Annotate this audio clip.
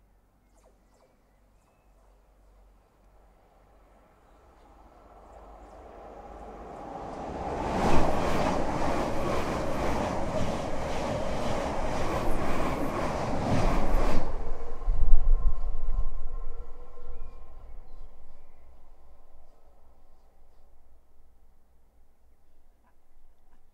Electric Train, Through Station, Close and Fast .mono
Smaller train going fast through the station not as fast as the others and not as long so the passing time is slightly shorter and not as loud when it comes to wind wash from the train. Rail chirping can be heard as it approaches and it has a long tail as it disappears away into the distance.
Recorded using a senheiser shotgun mic in a blimp
rattle, vibrations, slowly, riding, passenger-train, clatter, iron, junction, clang, wheels, vibration, electric-train, rail-way, rail, train, express, electrical, rumble, railway, metal, electric, pass, locomotive, passing, rail-road